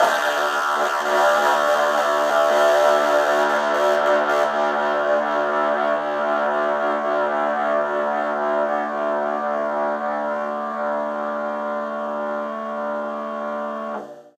Electric guitar being routed from the output of a bass amp into a Danelectro "Honeytone" miniamp with maximum volume and distortion on both.
guitar, overdrive, fuzz, chords, electric, power-chord, distortion